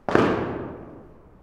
Kirkstall Room One Stomp
crackle, phonograph, record, surface-noise